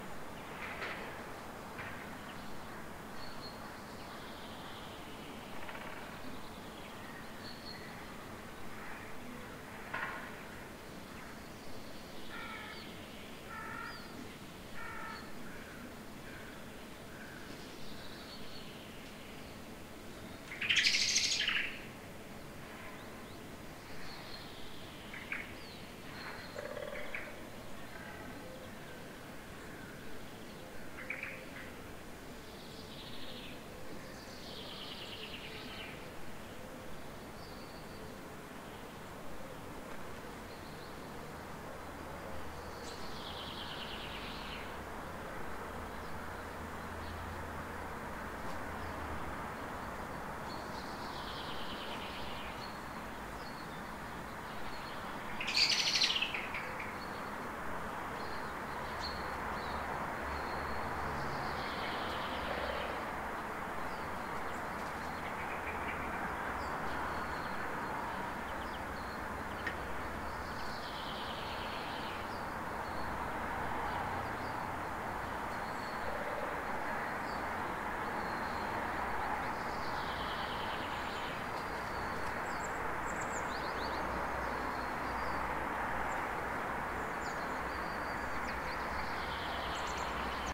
Recorded with Zoom H2 at 7:30 am. Near street-noice with several birds
morning, garden, 6channel, graz, birds